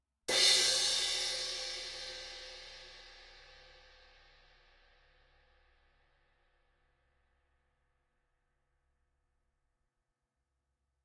Zildjian K 17" Dark Crash Medium Thin Softer Hit - 1990 Year Cymbal
Zildjian K 17" Dark Crash Medium Thin Softer Hit
K, Zildjian, Dark, Hit, Softer, Thin, Crash, 17, Medium